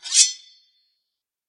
Sword noises made from coat hangers, household cutlery and other weird objects.
Action, Battle, Blade, Draw, Fight, Foley, Slice, Sword, Swords, War, Whoosh